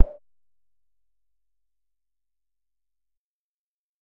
Tonic Short FX 2
This is a short electronic effect sample. It was created using the electronic VST instrument Micro Tonic from Sonic Charge. Ideal for constructing electronic drumloops...
drum, electronic